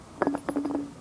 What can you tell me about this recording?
Rolling Bottle 01
Sounds made by rolling a small glass bottle across concrete.
bottle, glass, roll